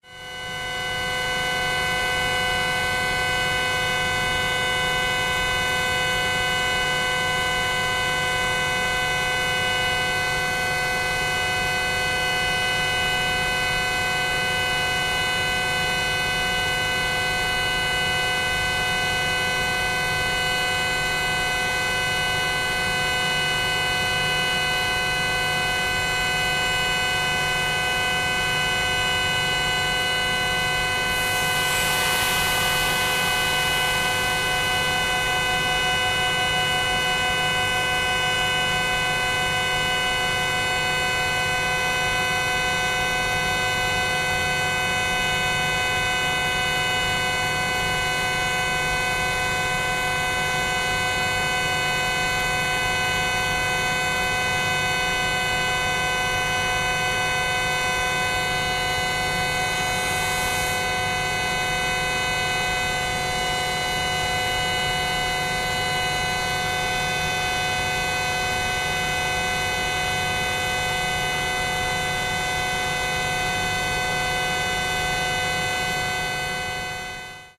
Normal operation of an air compressor in a Chemical Plant. Recorded whit a H4n and wind filter.
Spelling errors are the fault of Google Translator... ;)
air, chemical, compressor